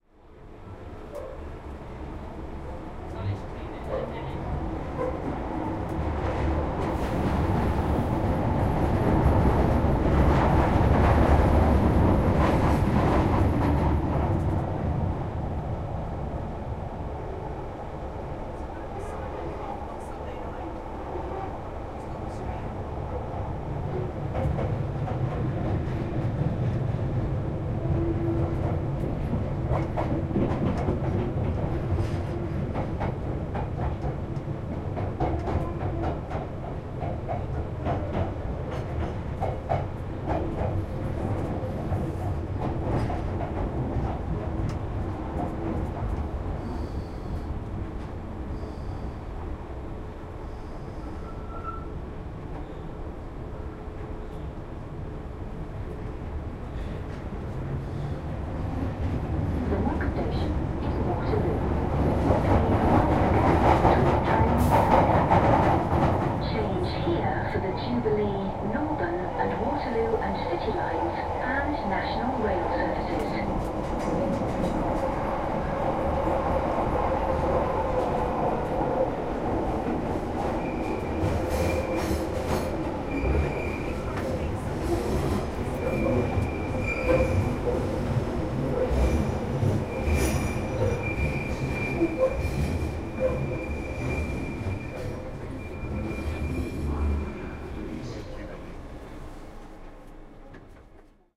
London Underground Train, Interior, A
Raw audio of the interior of a London Underground Tube Train travelling between stations.
An example of how you might credit is by putting this in the description/credits:
The sound was recorded using a "H1 Zoom recorder" on 9th September 2017.
london, underground, inside, tube, travel, train, travelling, interior